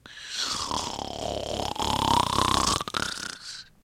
gnarly snorty sound
beatbox, bfj2, creative, dare-19, snort